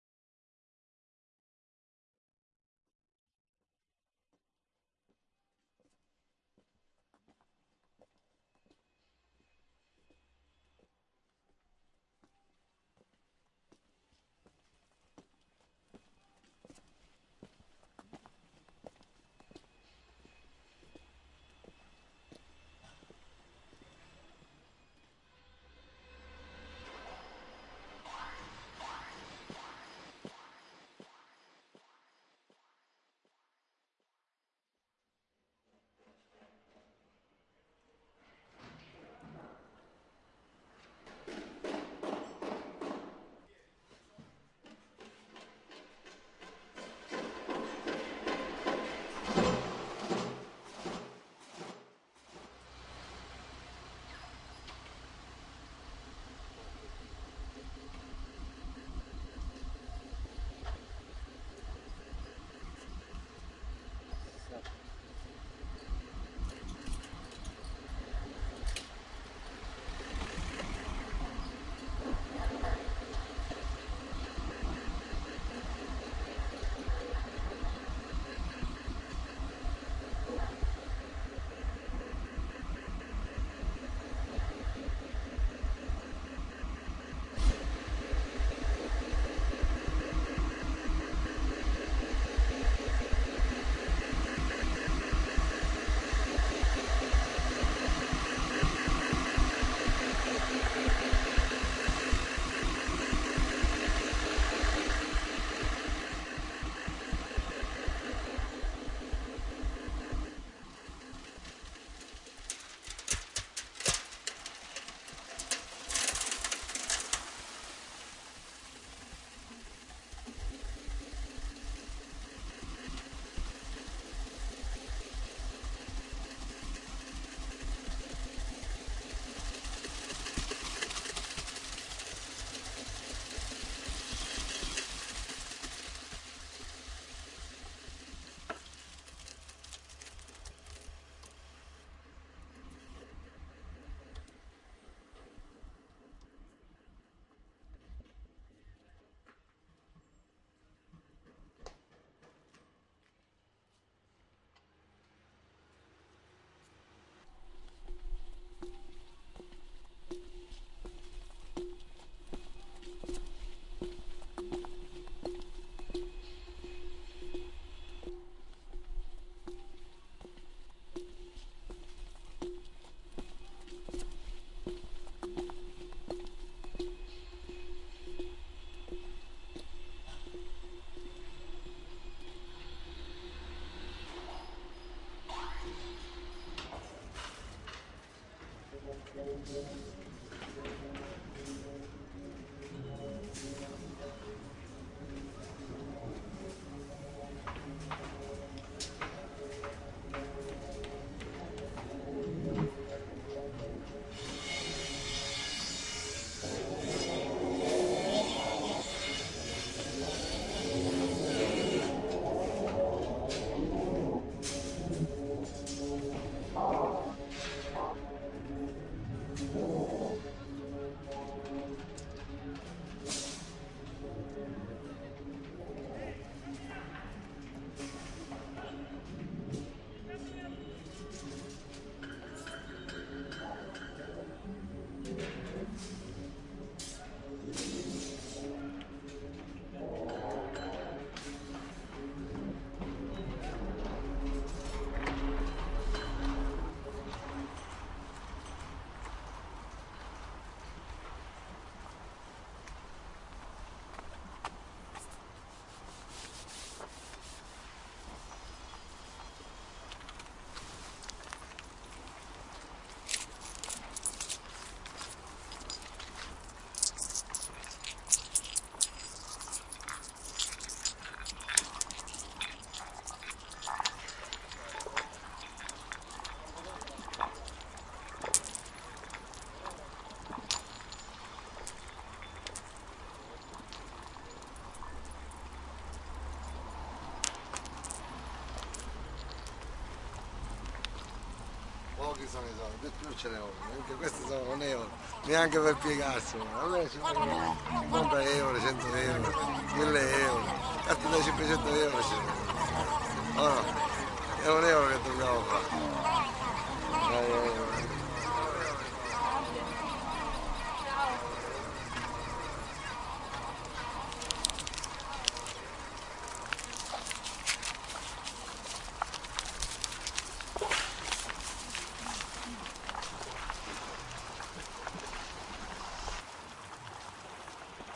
Soundwalk. part of the field recording workshop "Movimenti di immagini acustiche". Milan - October 29-30 2010 - O'.
Participants have been encouraged to pay attention to the huge acoustic changes in the environment of the Milan neighborhood Isola. Due to the project "Città della moda" the old Garibaldi-Repubblica area in Milan has turned into a huge bulding site.
massobrio, orsi, milan, processed, isola